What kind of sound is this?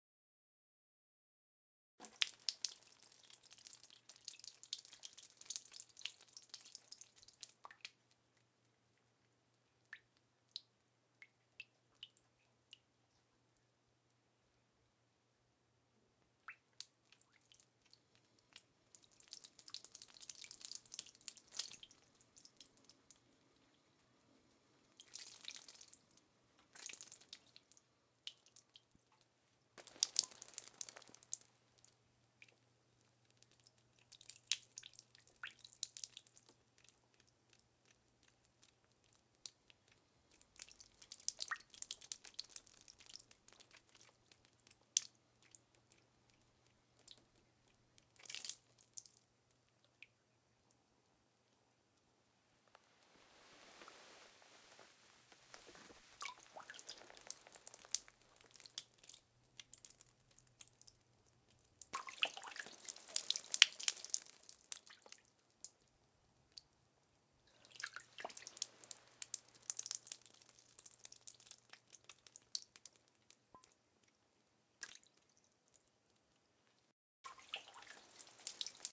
water trickle drips drops small splash onto concrete floor low ceiling unfinished basement
basement, concrete, drips, drops, floor, low, onto, small, unfinished